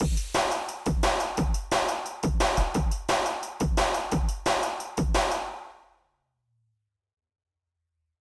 Weak DnB Drum Loop
Just a little DnB loop that you can use in a liquid DnB song.
bass, delay, dnb, drum, drums, fl, loop, studio